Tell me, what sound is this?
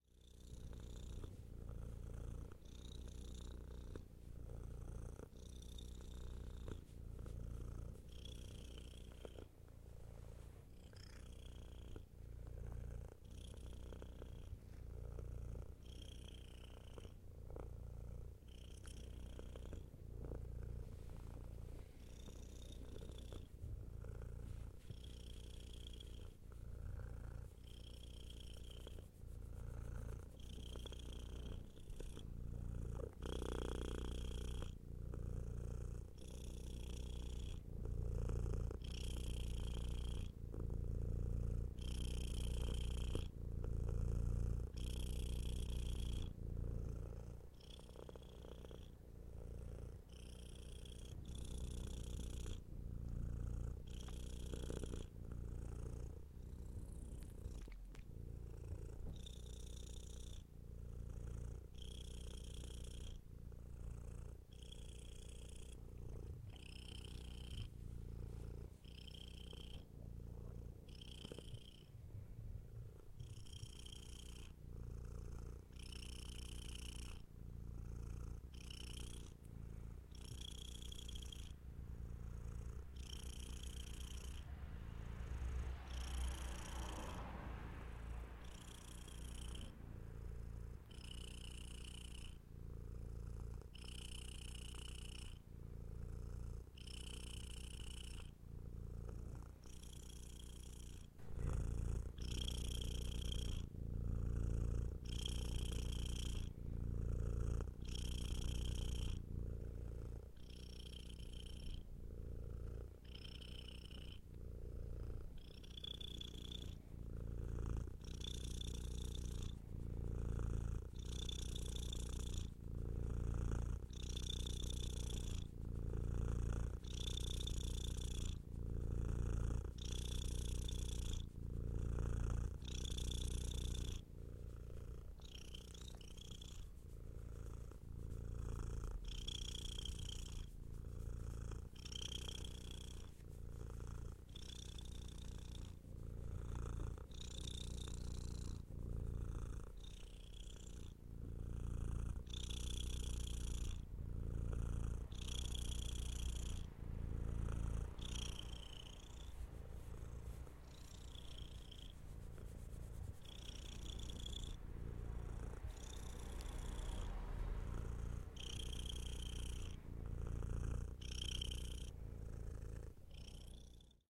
04.05.2013: 02.00 at night. the last night of my first cat Odyssey. she died at 22.00 the same day. she purred in my bad. Gorna wilda street in Poznan (Poland).
recorder: zoom h4n
poland, domestic-sound, purr, cat, animal, field-recording, poznan